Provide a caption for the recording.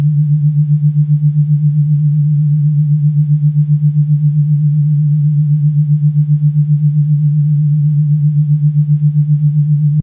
Read the description on the first file on the pack to know the principle of sound generation.
This is the image from this sample:
processed through Nicolas Fournell's free Audiopaint program (used the default settings).
I also notice this file is now stereo, with different signals on each channel.